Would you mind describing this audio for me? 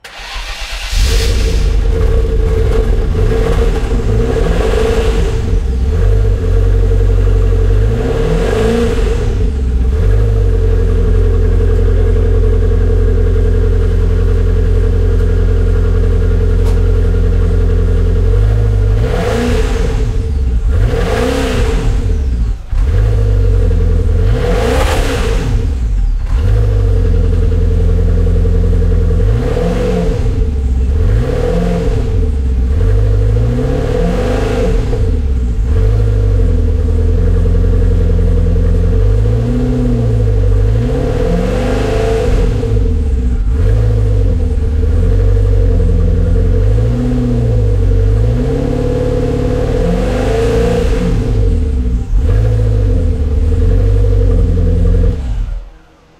Maserati Exhaust all
automobile, car, engine, ignition, sports, vehicle